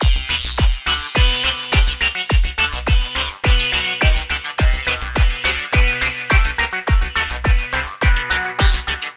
TR LOOP - 0503
goa goa-trance goatrance loop psy psy-trance psytrance trance